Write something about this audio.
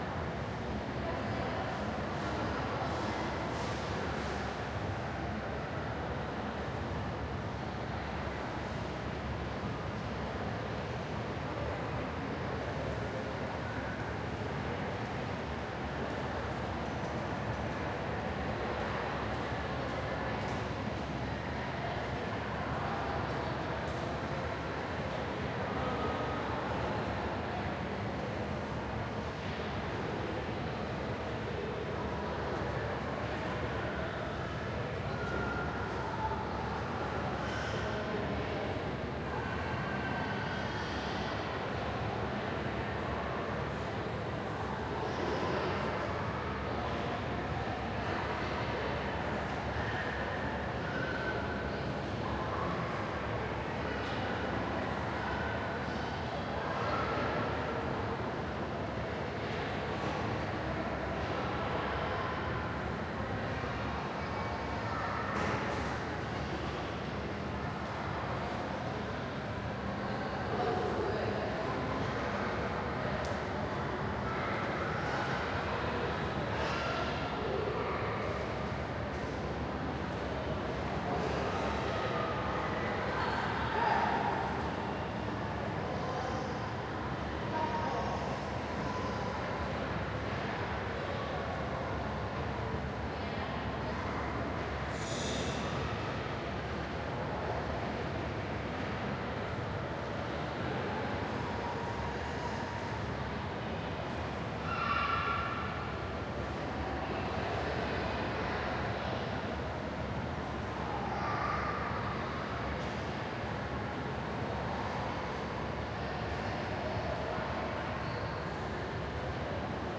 NPM07CorridorWestOfEastCourtE Still
Part of the Dallas Toulon Soundscape Exchange Project. A recording of the corridor just west of the east court at NorthPark Mall in Dallas, TX on a Saturday afternoon (about 2-3pm). Unfortunately, there is a bit of reverberation, but there aren’t many places in NorthPark Mall that don’t have it. Recorded with a Zoom H1 Handy Recorder on tripod facing east. Density: 7 Polyphony: 2 Busyness: 5 Order to Chaos: 3
tx, mall, west, northpark, field-recording, corridor, east, texas, dallas, court